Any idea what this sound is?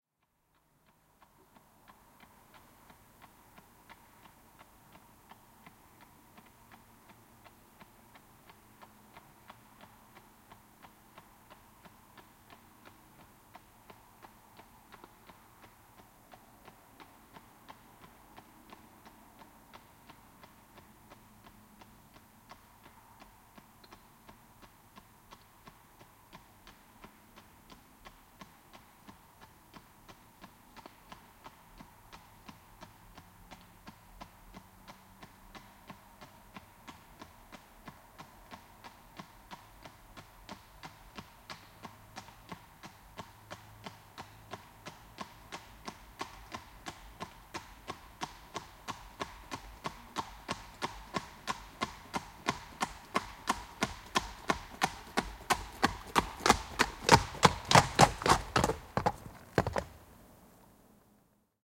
Hevonen, tulo, kaviot / Horse approaching at a lazy trot on asphalt, stopping, hooves clattering
Hevonen lähestyy laiskaa ravia asfaltilla, pysähtyy, kavioiden kopsetta.
Paikka/Place: Suomi / Finland / Kitee, Sarvisaari
Aika/Date: 12.07.1982